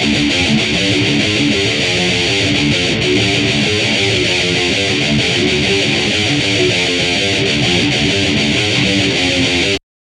REV LOOPS METAL GUITAR 7
rythum guitar loops heave groove loops